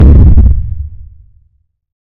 building destruction noise
sound effect for game
16, bit, building, demolition, destroy, destruction